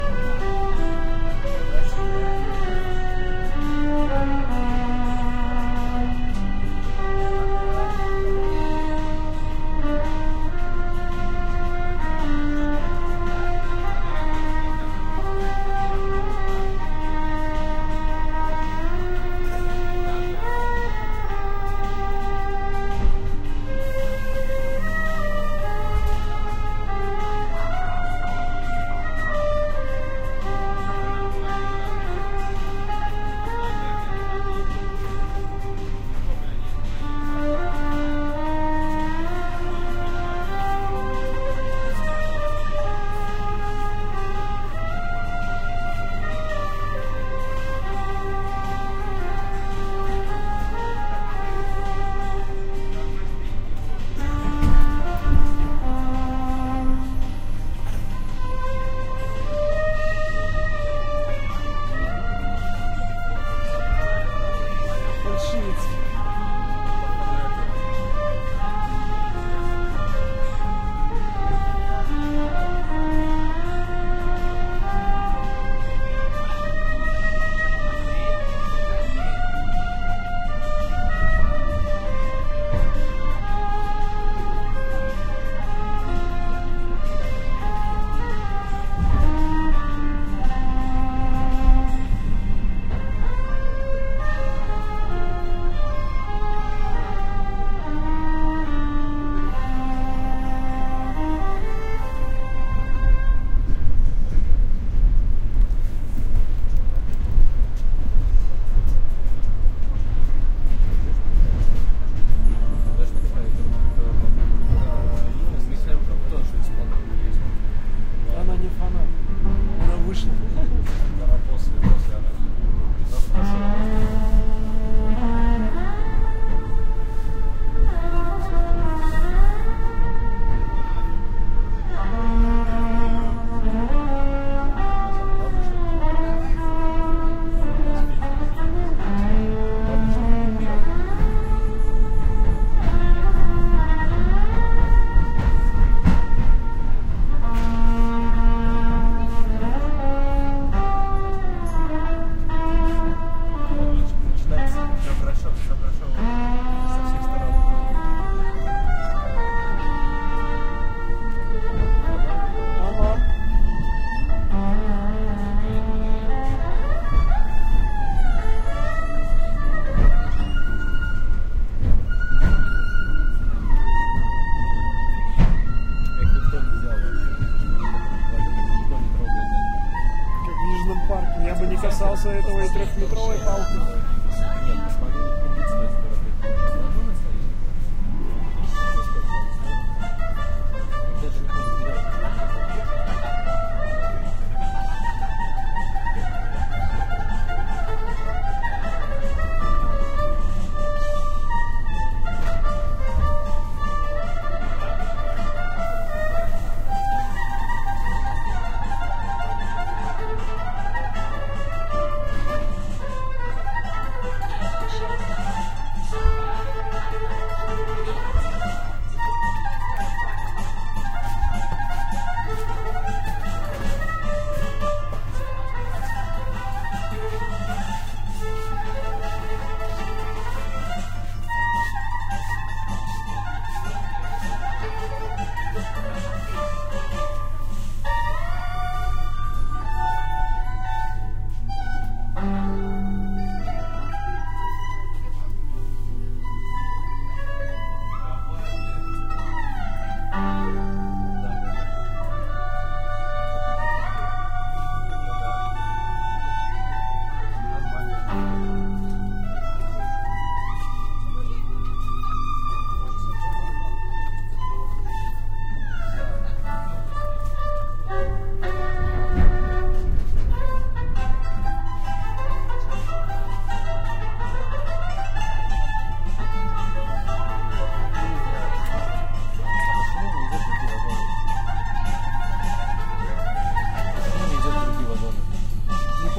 Violin player in suburban train Moscow - Petushki, OMNI mics
Street musician plays violin in a wagon of riding suburban train on Moscow-Petushki route.
Recorded with Roland R-26's internal mics.
street-musician, Moscow, wagon, field-recording, Russian, railway, suburban-train, ambience, crowd, street-music, train-ride, Russia, ride, Petushki, musician, train, violin